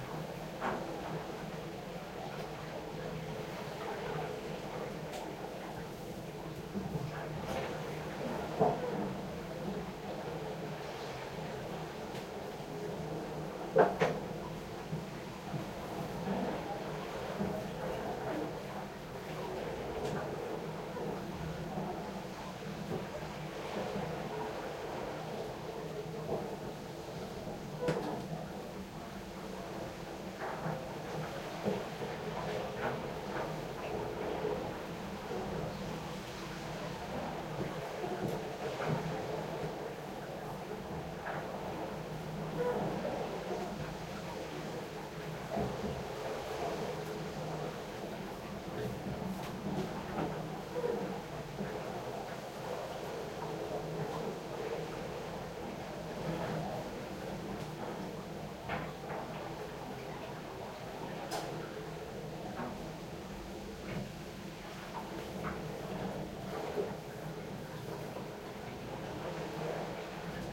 Lovis ship sailboat below deck tight hallway water sloshing around hull, wood ship sounds
below, deck, sailboat, ship